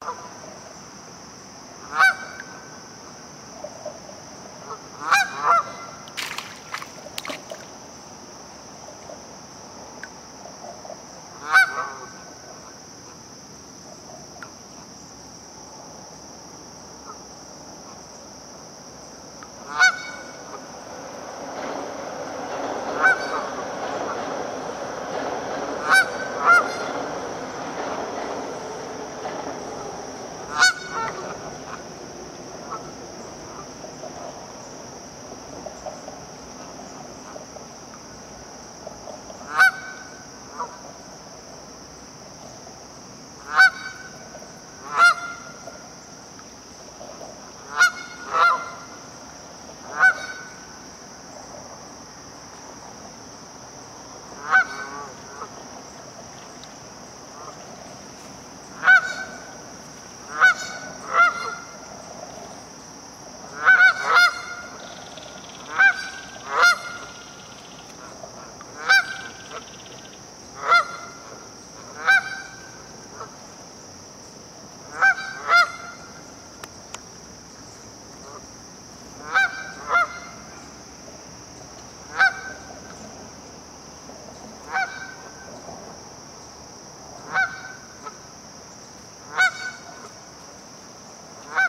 geese honking honk
Canadian geese honking in river with crickets and fish jumping. City BG noise with overhead subway train pass in distance.
Channel.
crickets, geese, squawk, subway